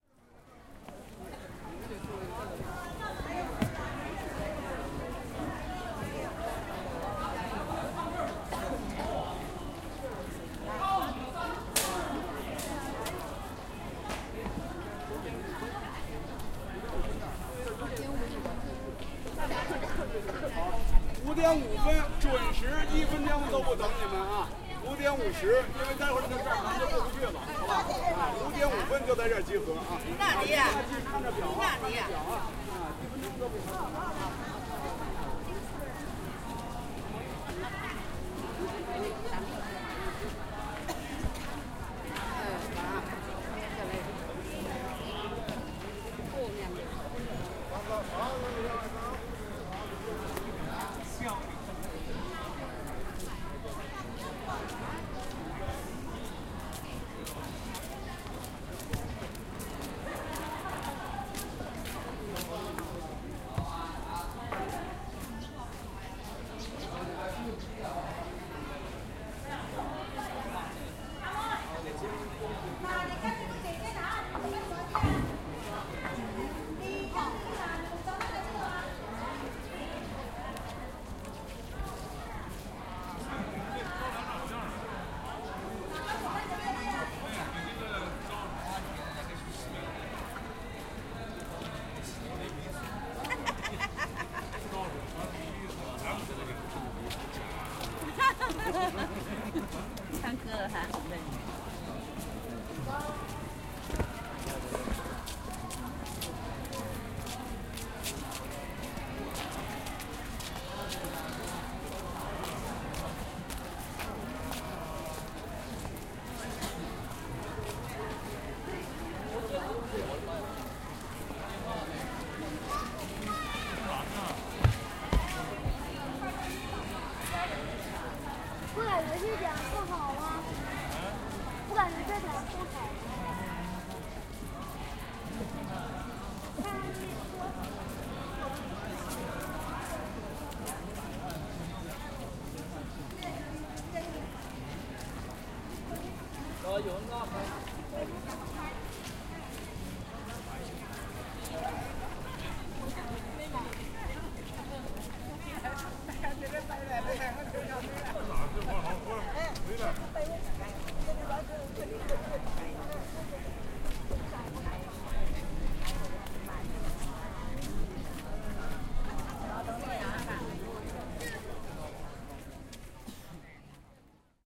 0358 People talking
People talking at the entrance of the National Folk Museum next to Gyeongbokgung Palace.
20120711
seoul, field-recording, voice, korea, footsteps, korean